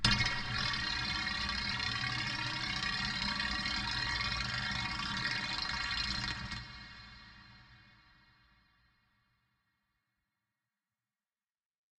again the same as before but this time with reverberation and a 2s long tail.
gurgling, metal, oscillating, reverberated, shimmying, spinning, whirring